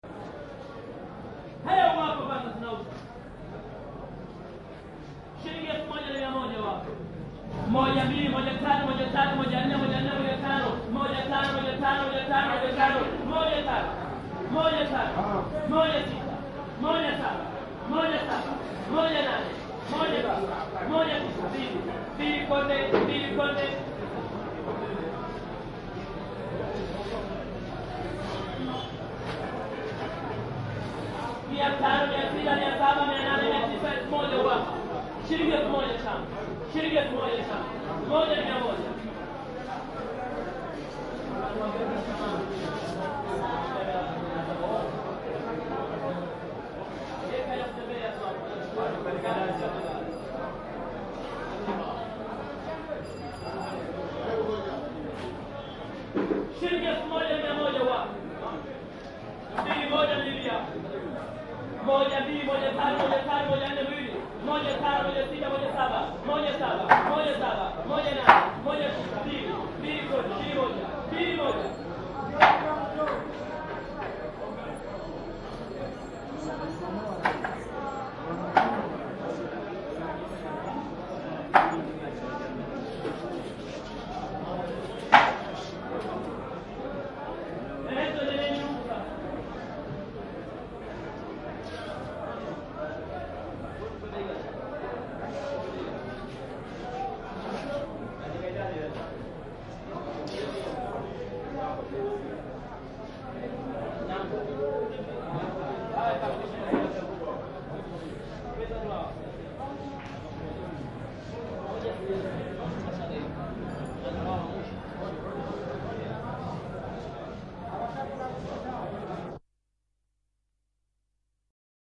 Zanzibar - auction fish market
Recorded with a good old Nagra (tape).
Auction at the fish market, Zanzibar.
In kiswahili.
fish-market, swahili, zanzibar